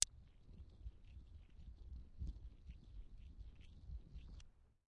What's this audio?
Two glass marbles being rubbed together. Fairly high background noise due to gain needed to capture such a soft sound. Gritty lower-pitched noisy sound is the result. Close miked with Rode NT-5s in X-Y configuration. Trimmed, DC removed, and normalized to -6 dB.
marble, noisy, rub